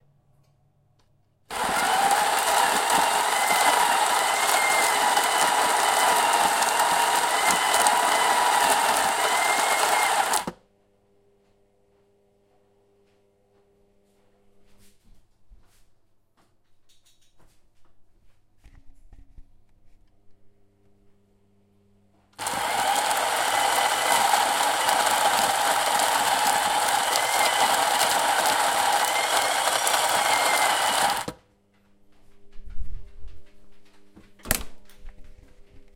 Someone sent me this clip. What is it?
This is the sound of me recording a Coffee Bean Grinder up close.
Recorded on a Tascam DR-40.